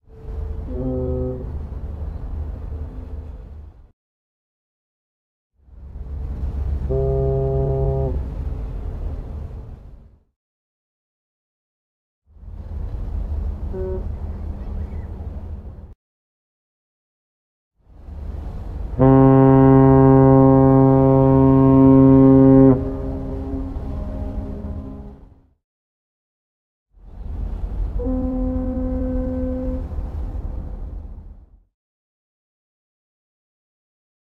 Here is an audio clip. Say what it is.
shiphorns-distant
This is recorded from an installation on the maiden's tower in the Bosphorus strait in Istanbul, Turkey. It has the the sounds of ship horns.
horn, istanbul